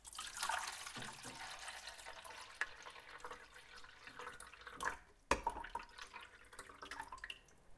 Pouring water to coffee machine. Recorded with Zoom h1.